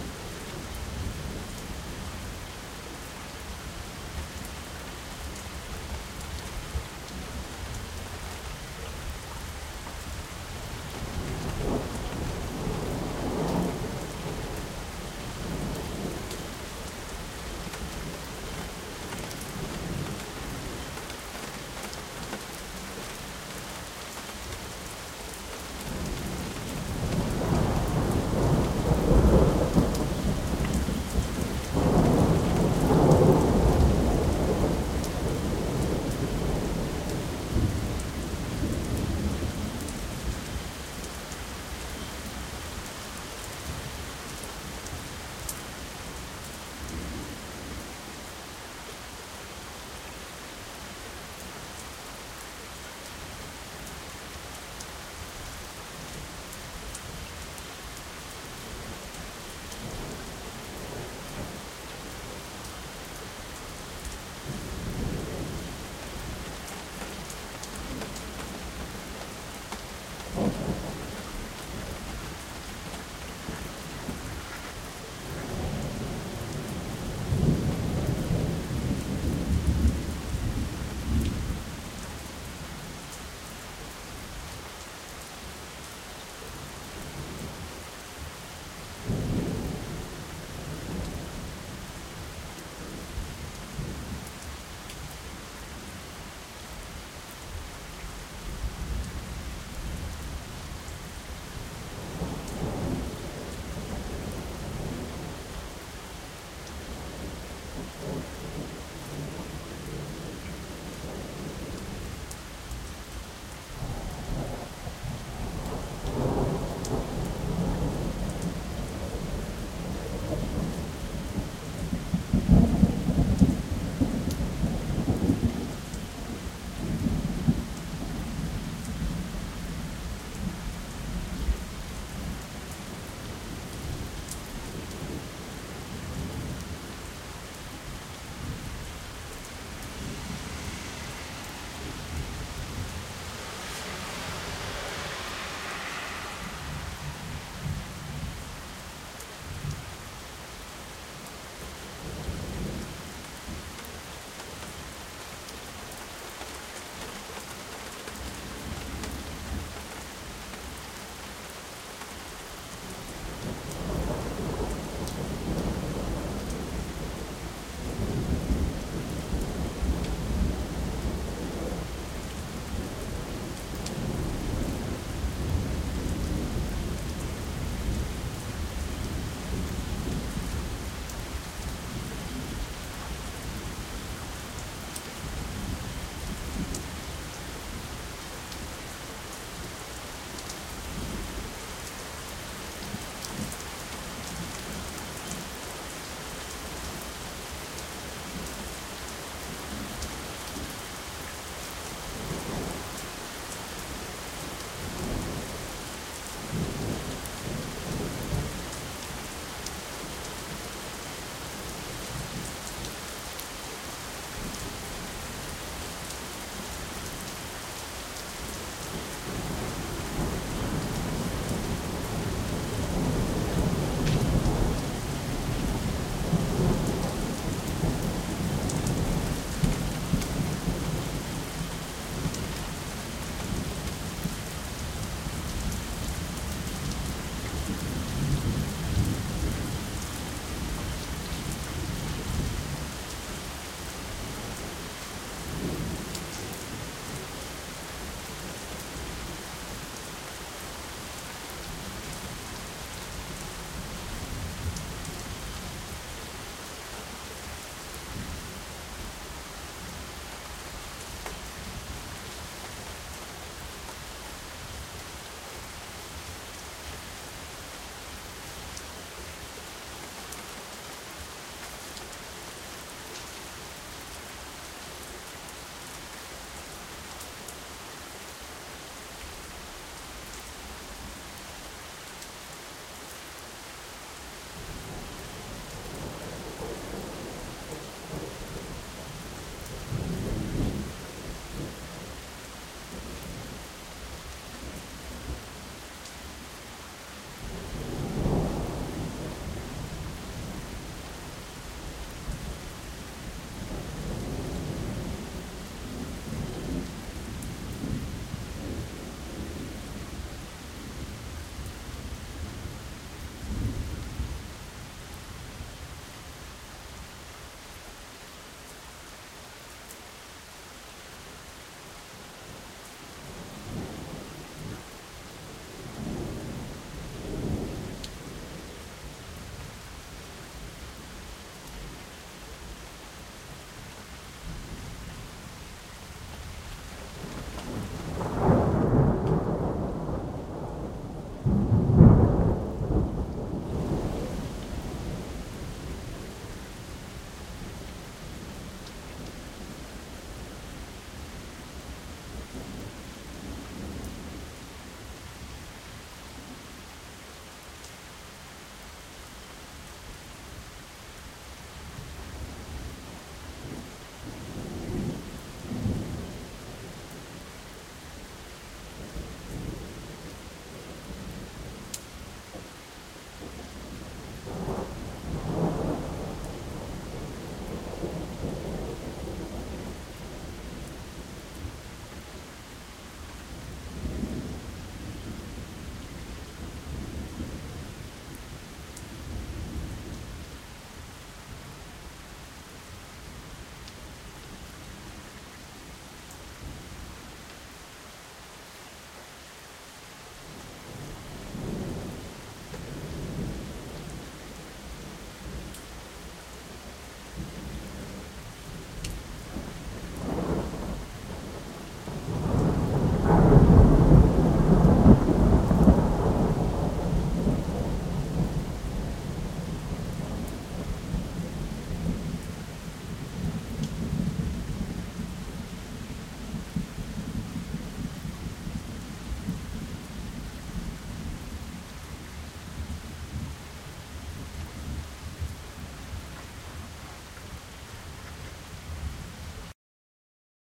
rain far thunder ambience dripping trop-003
rain, far, trop, dripping, thunder, ambience